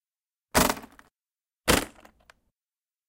Suitcase Latch
Here is the sound of a suitcase being opened by it's latches
close, closing, door, handle, latch, lock, open, shut, slam, suitcase, trunk